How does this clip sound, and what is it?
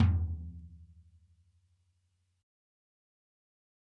Dirty Tony's Tom 14'' 034
This is the Dirty Tony's Tom 14''. He recorded it at Johnny's studio, the only studio with a hole in the wall! It has been recorded with four mics, and this is the mix of all!
14, 14x10, drum, drumset, heavy, metal, pack, punk, raw, real, realistic, tom